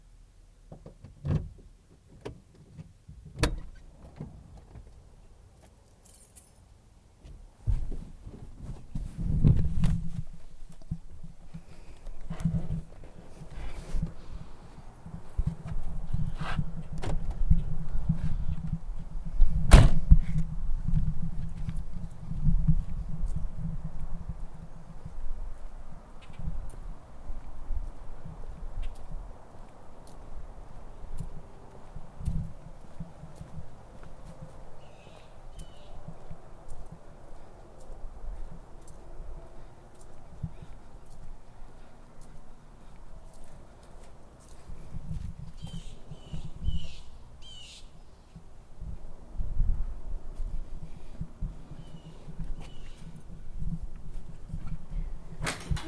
I recorded a surprise ending for first ridehome as perceived by a Samson USB mic and my laptop. This is me opening the door and walking as far as when someone jumps out and surprises me which I should have kept but erased in anger
field-recording, car, automotive